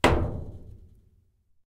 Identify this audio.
Scrap Tom Hard Hit 2
Tom made of metal scraps.